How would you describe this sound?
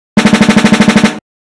Various Gun effects I created using:
different Snare drums and floor toms
Light Switch for trigger click
throwing coins into a bowl recorded with a contact mic for shell casings